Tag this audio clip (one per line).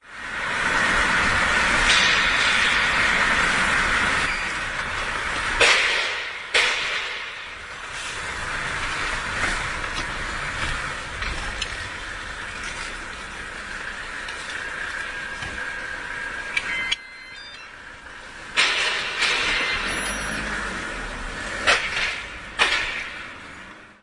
poznan
car
carpark
parkingmeter
starybrowar
parking